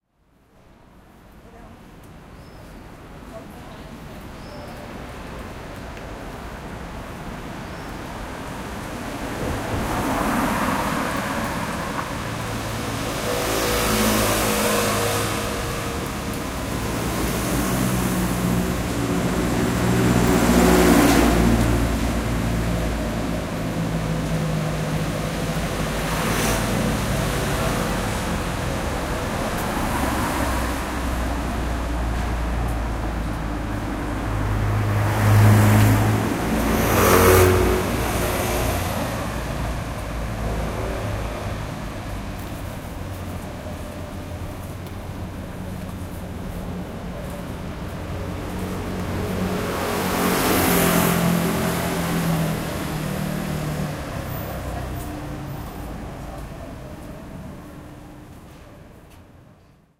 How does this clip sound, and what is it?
USJ Architecture Field Recording - Group 3 (2016)
Field Recording for the “Design for the Luminous and Sonic Environment” class at the University of Saint Joseph - Macao SAR, China.
The Students conducting the recording session were: Victory Igbinobaro and Andrew Jong
Field-Recording, Macau, Soundscape, University-of-Saint-Joseph